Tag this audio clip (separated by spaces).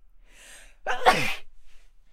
achoo sneeze